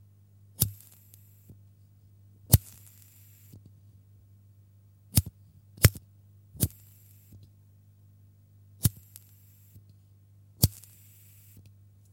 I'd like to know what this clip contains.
Lighting a lighter (regular, not zippo).